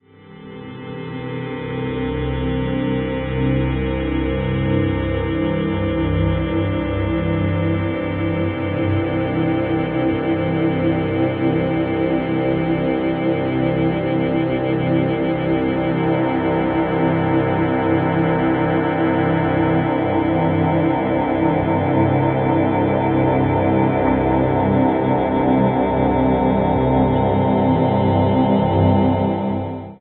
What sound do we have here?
Dark ambient drone created from abstract wallpaper using SonicPhoto Gold.